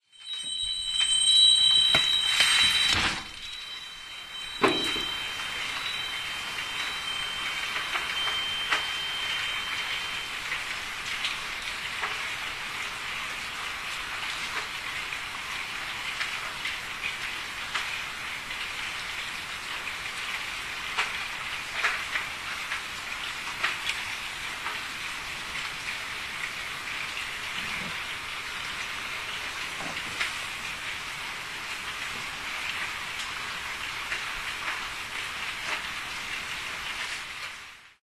opening window 131110
13.11.2010: about 15.00. my office at my place. tenement on Gorna Wilda street in Poznan. the sound of opening window. was raining outside.
bells, field-recording, noise, opening, rain, raining, tenement, window